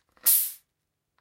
A quick and raw recording of the fizzy opening of a 2 litre bottle of coke.
An example of how you might credit is by putting this in the description/credits:
The sound was recorded using a "H1 Zoom recorder" on 2nd February 2016.